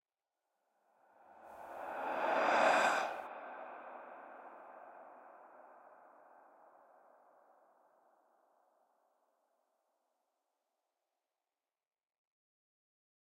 Male creepy blowing.Fade in and tail reverb 1-1(dns,Eq,MS,rvrb)
Otherworldly sound of male breathing. Close and walk-through stereo panorama. My voice was recorded, then processed with noise reduction. The processing includes equalization(remove voice resonances) and impulse reverb (including reverse) with filtering. Enjoy it. If it does not bother you, share links to your work where this sound was used.
Note: audio quality is always better when downloaded.